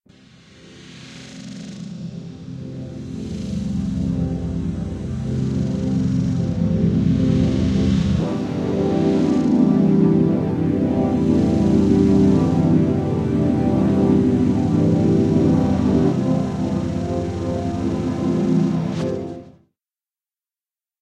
Elegant Glitchy Introduction
Please check up my commercial portfolio.
Your visits and listens will cheer me up!
Thank you.
fadein, ident, intro, electronic, glitch, glitchy, introduction